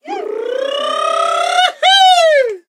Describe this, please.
BRRRRR-OOHOO 01
This sample pack contains people making jolly noises for a "party track" which was part of a cheerful, upbeat record. Original tempo was 129BPM. This sample is the artist making a vocal modulation up to the phrase "Ooh-hoo!"
vocals, shot, 129bpm, male, stabs, female, party, shots, vocal, stab